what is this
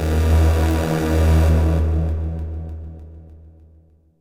Sine wave created and processed with Sampled freeware and then mastered in CoolEdit96. Stereo simulation of mono sample stage one with the addition of some more digital effects. This is an audio representation of an emotional wave during peak, usually causing teeth gritting or gibberish.
synthesis, larry, hackey, hacky, sac, free, sack, sound, sample, sine